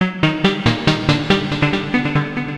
looking to the future
Mysterious future music
concerning, game, loop, thoughtful